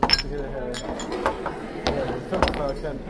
Designa Factory Sounds0025
field-recording factory machines
factory, machines